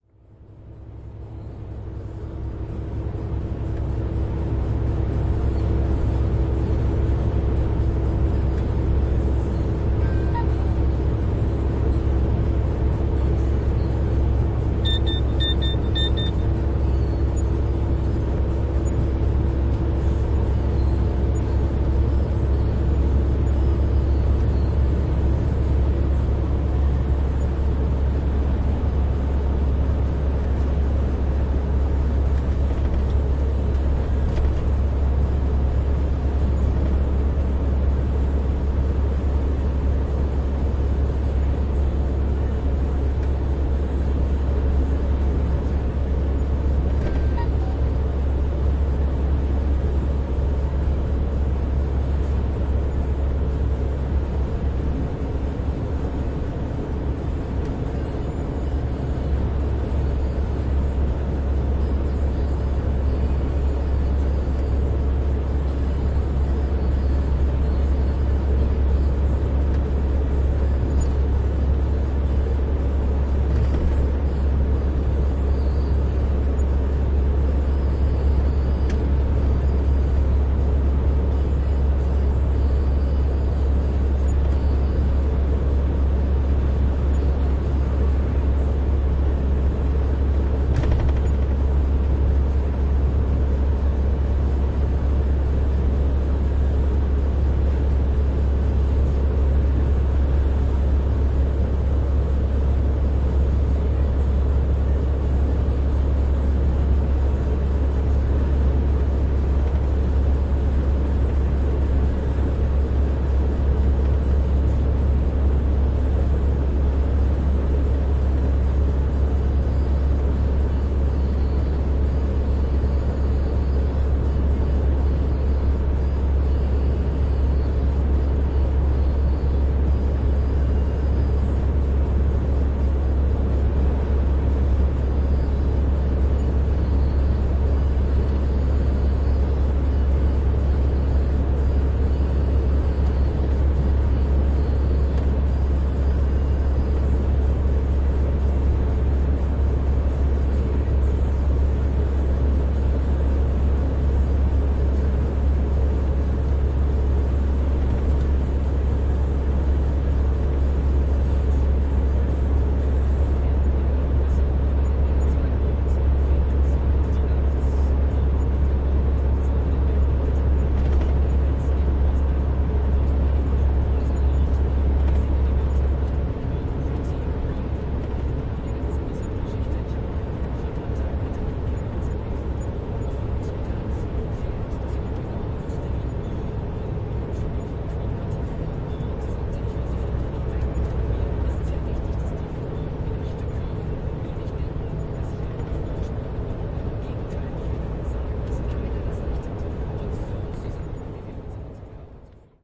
110815-on gluckstadt way
15.08.2011: sixteenth day of ethnographic research about truck drivers culture. Germany. On German road. Noise/drone made by the truck. Some music in the radio in the background.